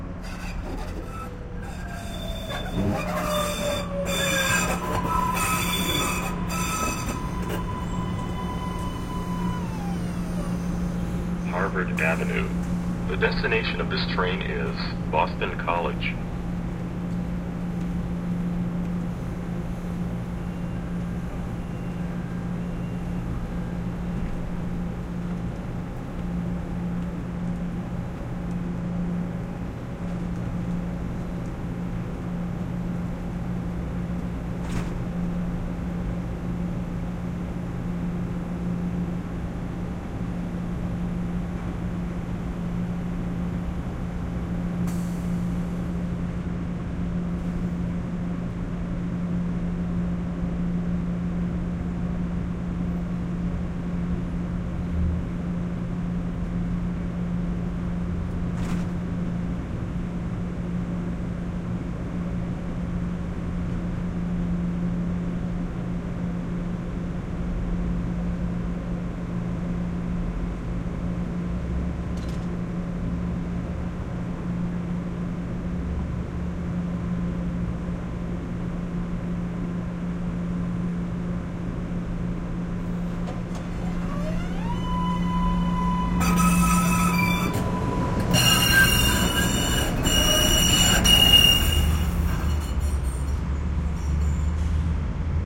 Exterior recording of a T train stopping at Harvard Ave. and then departing. Much Squeakier than the other recordings in this group.Recorded using 2 omni's spaced 1 foot apart.
stereo, squeaky, mbta, subway, boston, t, field-recording, train